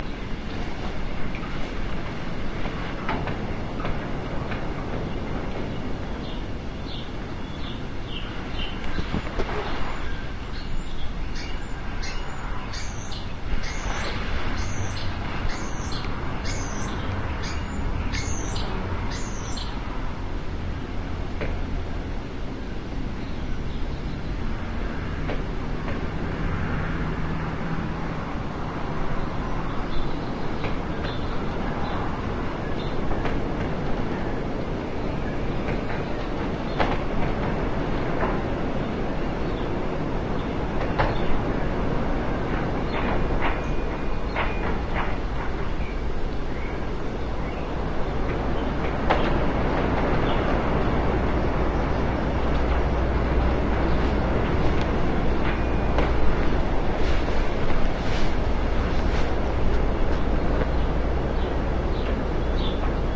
Inside Barn Wind:Birds
wind, birds, barn